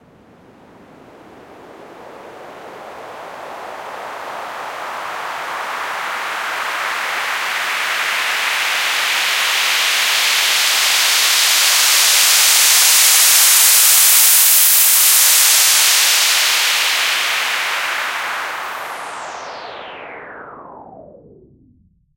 Lunar Uplifter FX 2
For house, electro, trance and many many more!
lunar, uplifter